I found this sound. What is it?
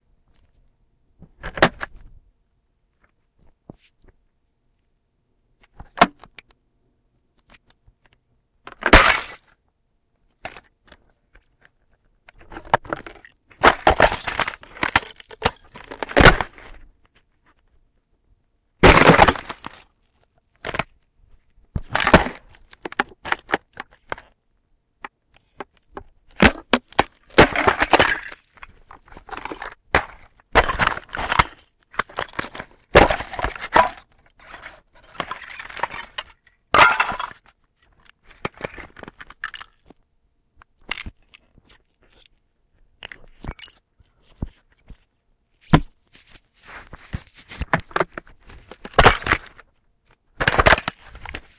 Breaking large ice
Here's a really cool sample I must thank the bad weather here on the east coast for :) I managed to get a really cool recording of a large piece of ice cracking when I stomped on it. It really turned out nice and I only had to do minimal noise-reduction in post-pro. There are some really meaty cracks and snaps starting around the first 1/4 of the file. Cheers!